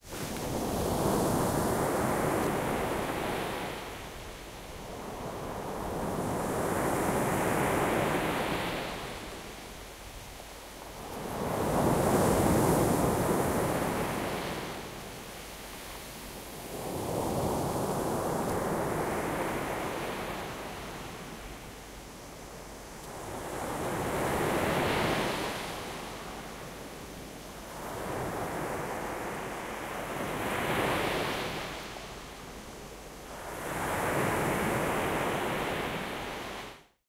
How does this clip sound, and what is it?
Recorded with Zoom H2N on a vacation on Cyprus.
Beach, Field-recording, Nature, Ocean, Peaceful, Sea, Splash, Water, Waves